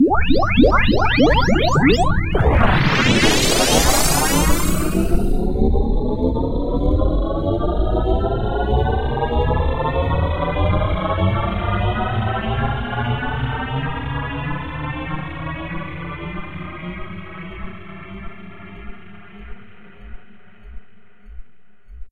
Electronic powerup style sound of large spacecraft or device, deep rumbling, electric dialup. Could also be used for futuristic spacecraft launch